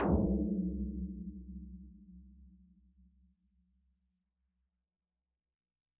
222065 Tom RoomHighReso 05
One of several versionos of a tom drum created using a portion of this sound
which was processed in Reason: EQ, filter and then a room reverb with a small size and very high duration to simulate a tom drum resonating after being struck.
I left the sounds very long, so that people can trim them to taste - it is easier to make them shorter than it would be to make them longer.
All the sounds in this pack with a name containing "Tom_RoomHighReso" were created in the same way, just with different settings.
dare-26, processed, tom, drum, tom-drum